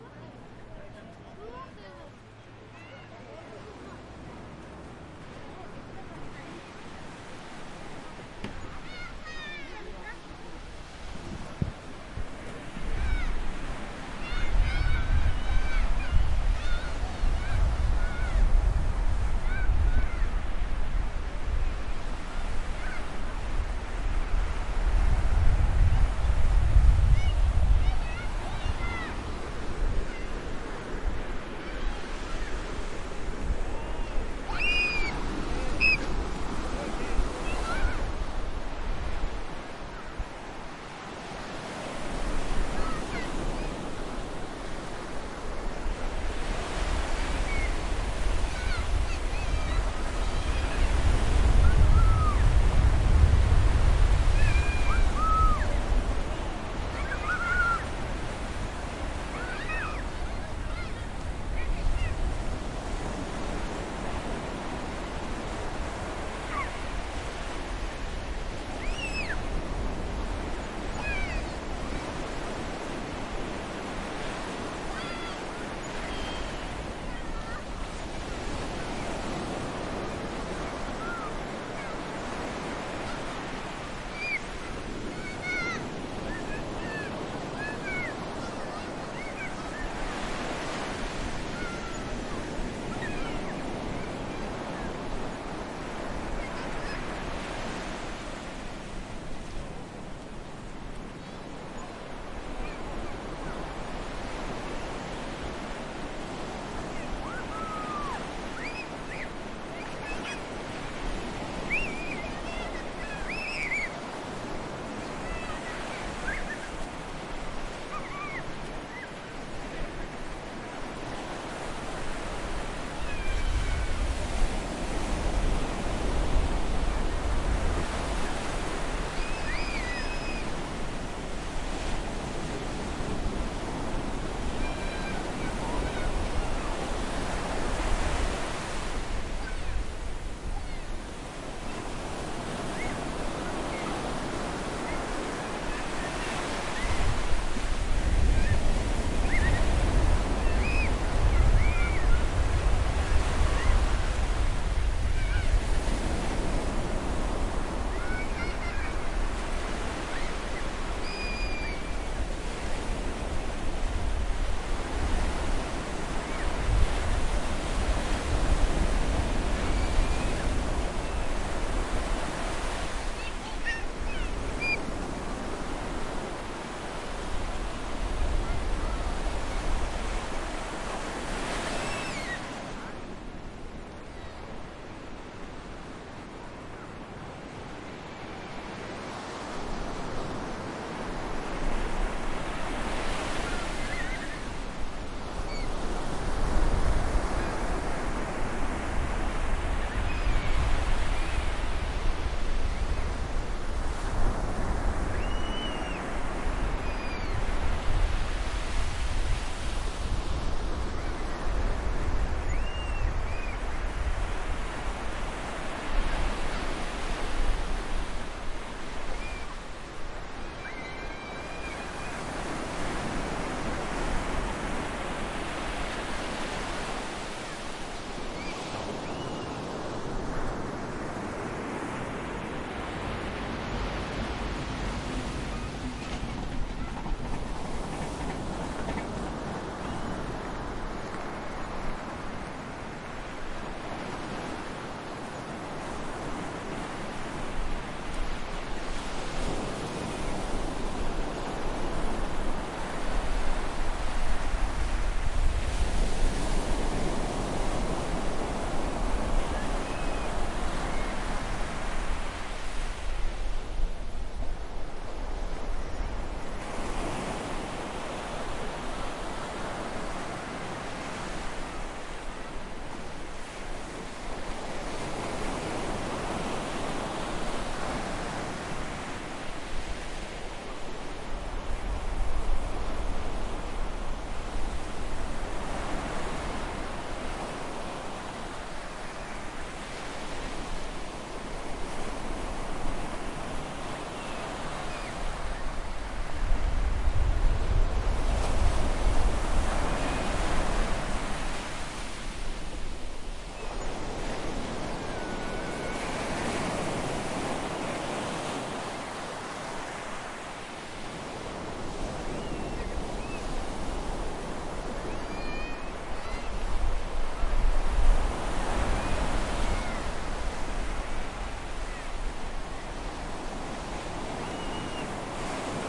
Garraf Beach Summer 2017 2
Beach ambience in a summer day, recorded next to the seashore, some children are playing with waves.
beach, children, coast, field-recording, ocean, sea, seaside, shore, water, waves